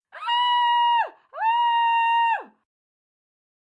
Mujer Gritando s
Scream, Screaming, Woman